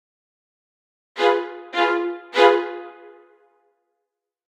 3 short hits with strings. Think this will be perfect in a scary movie a' la Hitchcock :) Made it in GarageBand for something called Victors Crypt.